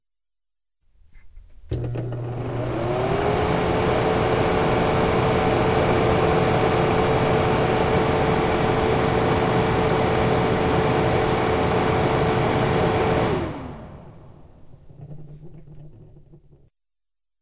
Bathroom Exhaust Fan
Fan, Exhaust, kitchen, Bathroom